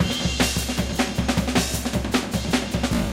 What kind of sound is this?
BR elp2 (unprocessed)
Unprocessed break loops used to create sequenced patterns in the track "incessant subversive decibels"
185, loops, bpm, breaks, funky